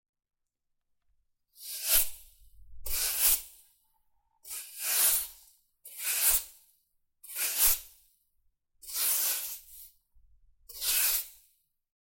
Sweeping with broom